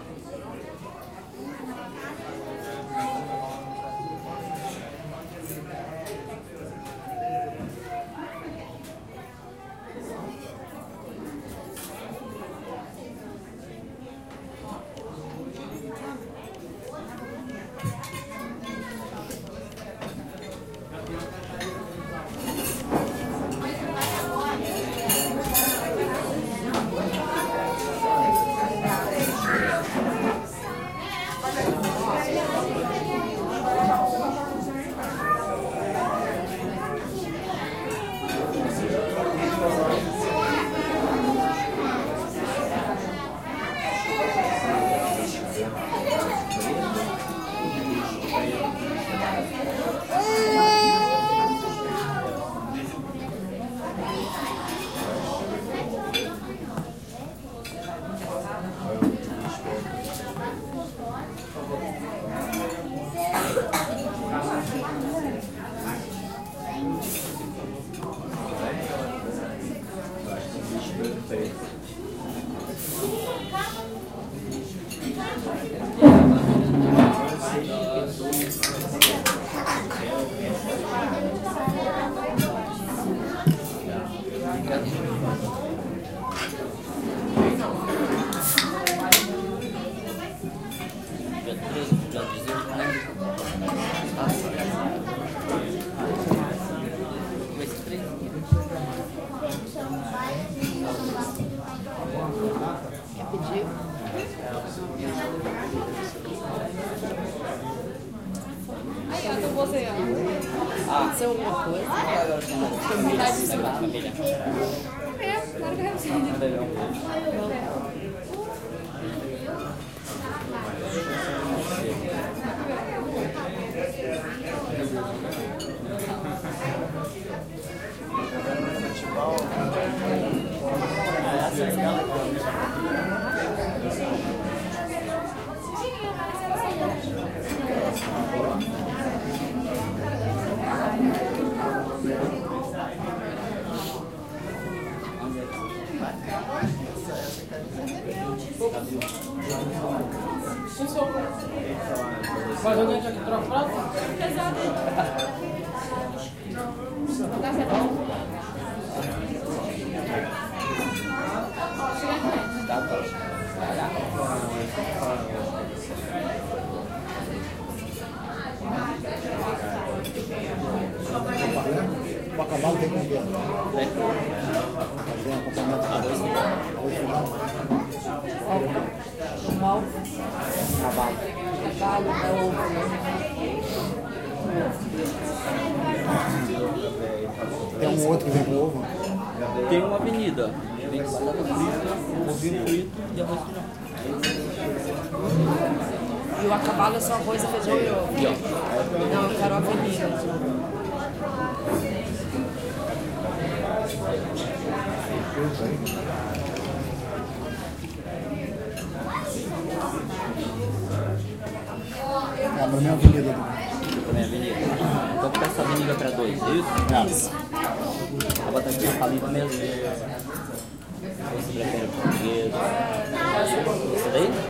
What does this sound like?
This is a recording of a traditional brazilian family restaurant opening and getting crowded during a sunny beautiful weekend in Rio de Janeiro.
stereo, plates, forks, restaurant